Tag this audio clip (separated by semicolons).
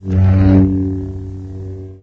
lightsaber; star